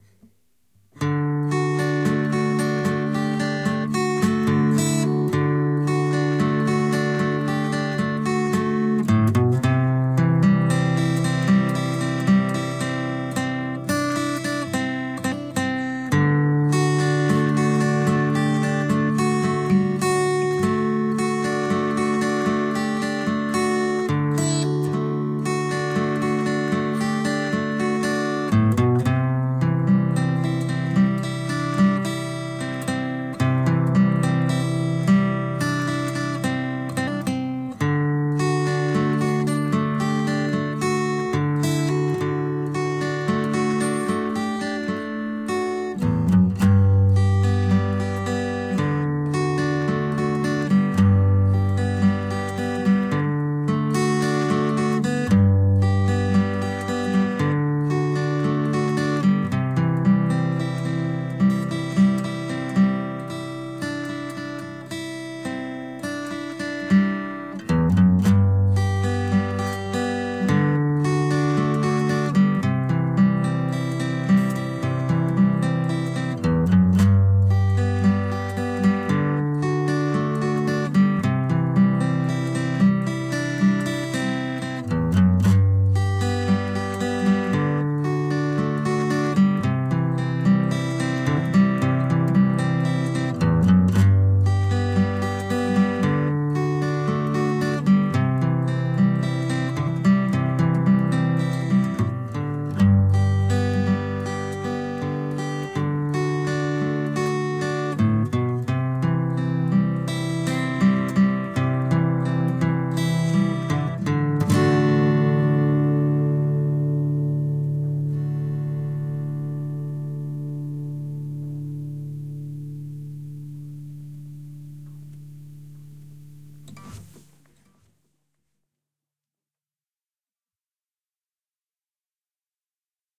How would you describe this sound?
A slow, fingerpicked chord progression on a 6-string guitar. I recorded this using my Zoom H4N recorder and some external, stereo microphones.
The microphones were the Samson C0-2 and I had them mounted on two separate
microphone stands: 1 was pointed at my left hand on the fret board to hopefully get the finger-squeaks, and the other microphone was pointed right above the sound-hole about 3 inches above the opening.
All I ask is that you use my real name, Kevin Boucher, in the credits.
ENjoy
acoustic, calm, mellow, peaceful, serene